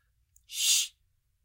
sch silence
an classic quiet sound to other person
quiet, roomtone, silence